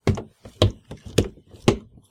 Footsteps-Stairs-Wooden-Hollow-02
This is the sound of someone walking/running up a short flight of wooden basement stairs.